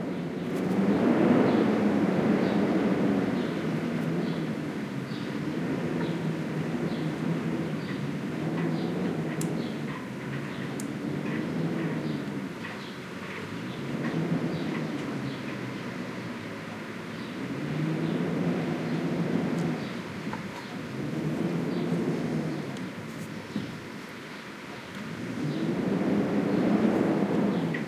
Wind howling recorded from the inside of a house. Warning: quite contradictorily, House Sparrow chirpings outside are perceptible. This sample can be looped. Primo EM172 capsules inside widscreens, FEL Microphone Amplifier BMA2, PCM-M10 recorder. Sanlucar de Barrameda (Cadiz province, Spain)